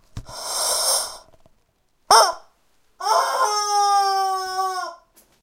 rubber chicken11
A toy rubber chicken
screaming, scream, toy, honking